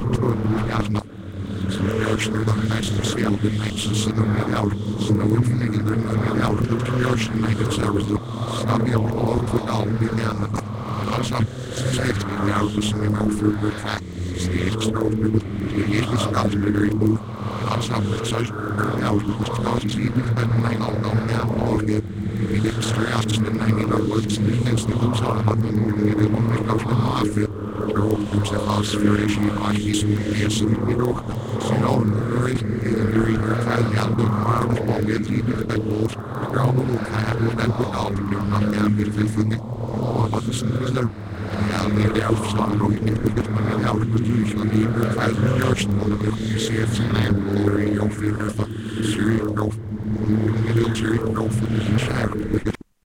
Snew Elcitra
Synthesized and processed stereo voice encoded in Cool Edit 96 with High Beta Brain Waves.
beta, synthetic, brainwave, voice